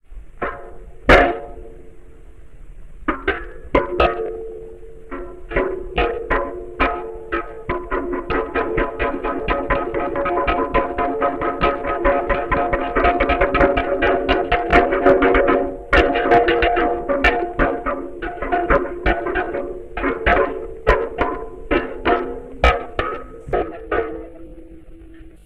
antique metal votive holder1(2-1 mixdown)
An earlier sound of me hitting an antique votive holder
was modified(see tagline). When I re-processed the sound
I stretched the sample out and gave it more re-verb while
also lowering the pitch.
banging, hit, bang, metallic, muted-cymbals, cowbells